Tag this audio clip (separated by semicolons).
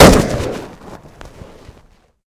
explosion; gun; loud; shot